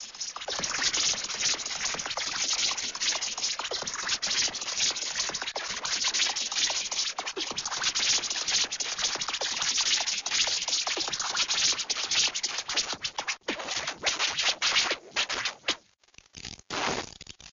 big bug bent